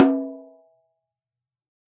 TT10x8-HP-Hd-v05
A 1-shot sample taken of a 10-inch diameter, 8-inch deep tom-tom, recorded with an Equitek E100 close-mic and two
Peavey electret condenser microphones in an XY pair.
Notes for samples in this pack:
Tuning:
LP = Low Pitch
MP = Medium Pitch
HP = High Pitch
VHP = Very High Pitch
Playing style:
Hd = Head Strike
HdC = Head-Center Strike
HdE = Head-Edge Strike
RS = Rimshot (Simultaneous Head and Rim) Strike
Rm = Rim Strike